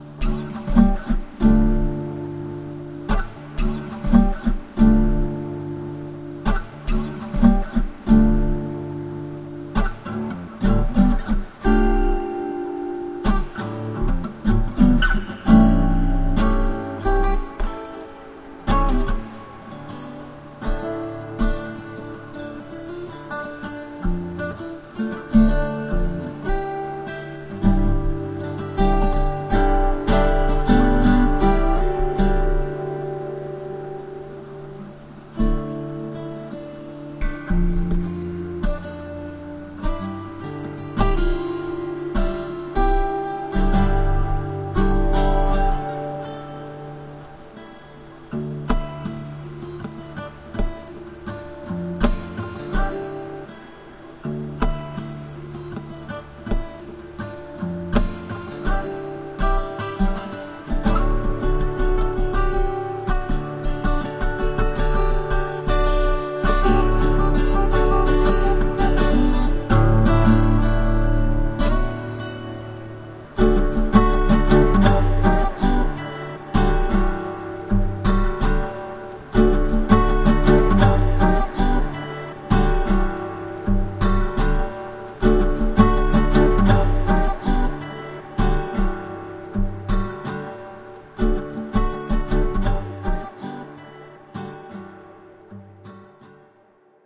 I recorded the acoustic guitar with Audacity, then added the FX with Reaper. It is named 5pm because that was the time when I recorded it.
In Reaper I made 3 tracks of the same recording and added different FX to each one:
Track 1 contained reverb and flange with adjusted tempo.
Track 2 contained a pitch delay doubler.
Track 3 contained a resonant lowpass filter - low quality.
acoustic, guitar, music